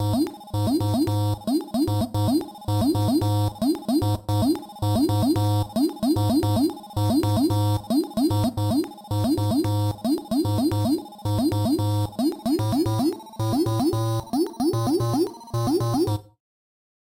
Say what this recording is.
GAMEBOY ATTACK FILL
112-bpm, 8-bit, arcade, bit, coco-jammmin, digital, EDM, electronic, fill, gameboy, lazer, lo-fi, moombahton, retro, synth, vintage